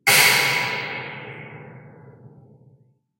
Gt release 02
Single reverberating hit using my Gtrelease sample.